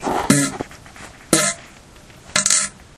forcefull toilet parps
fart poot gas flatulence flatulation explosion noise